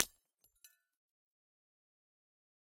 Small glass holiday ornament shattered with a ball-peen hammer. Bright, glassy shattering sound. Close miked with Rode NT-5s in X-Y configuration. Trimmed, DC removed, and normalized to -6 dB.
smash, ornament, shatter, bright, hammer, glass